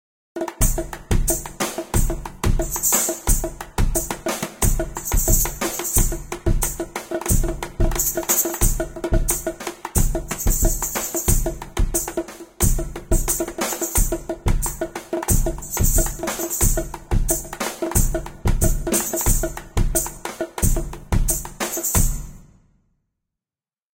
4/4 - 90 bpm - Drums - Arabian Nights
A special drumloop for the lovers of arabic music.
arabic, bongo, arabia, persian, players